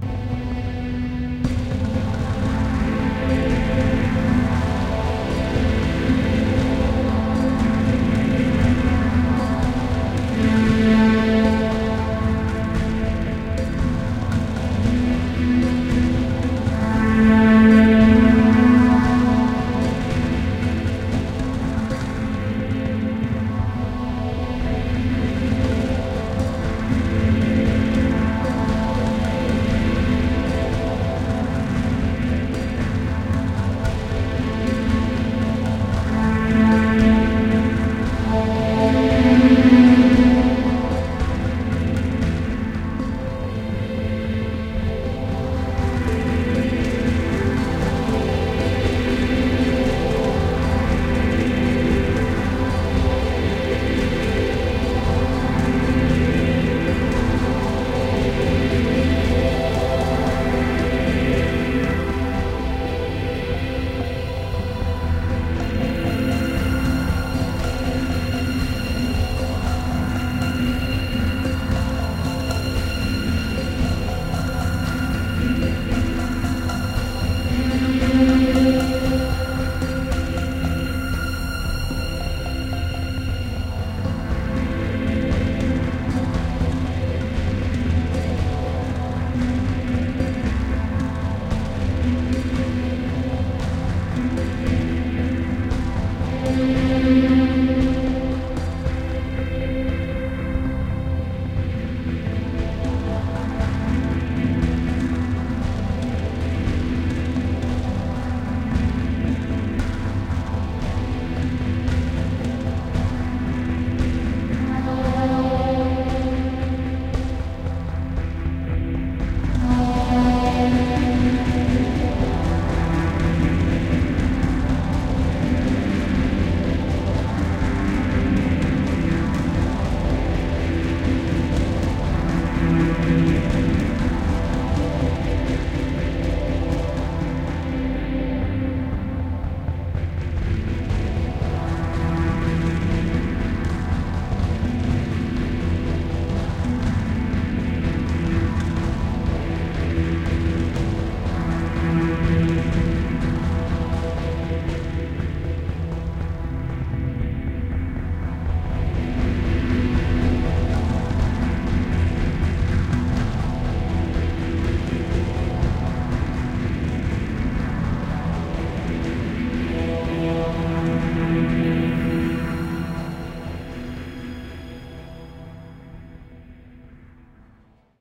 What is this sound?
Strings and Drums
This is a combination of Drum Rhythms and Smooth strings, both made from the same midi files but processed through the daw differently. An upbeat cinematic sound with some forward momentum.
atmosphere, improvised, music, pad, rhythm, synth, warm